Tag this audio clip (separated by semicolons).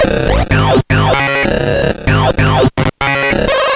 loop; 8bit; lsdj; gameboy; techno; nintendo; electronic